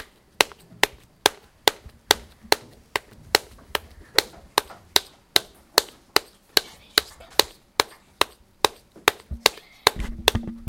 Mysounds HCP Annouck kaleidoscope
This is one of the sounds producted by our class with objects of everyday life.
Pac, Theciyrings, France, Mysounds